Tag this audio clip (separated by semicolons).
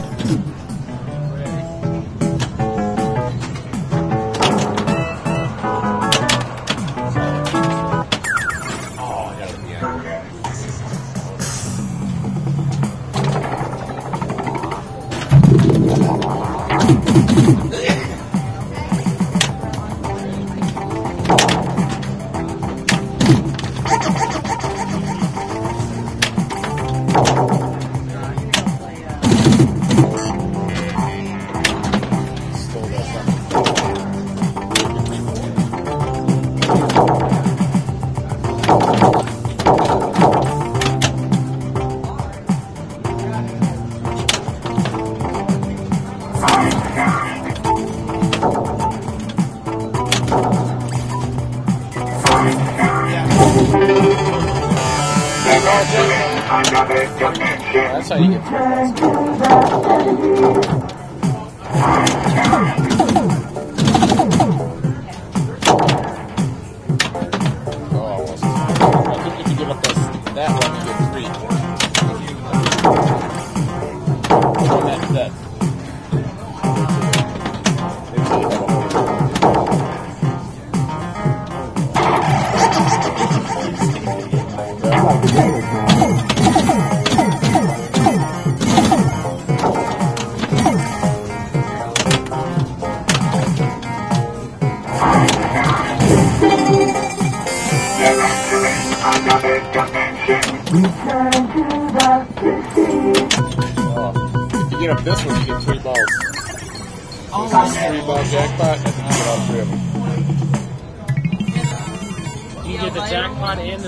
arcade; electronic; voices; pin-ball; time-machine; game; pinball